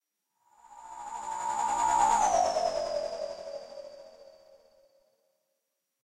granular passby. Created using Alchemy synth